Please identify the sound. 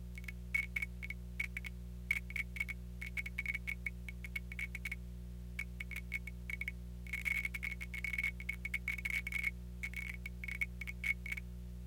Light Noise Scrap1
designed using an emx-1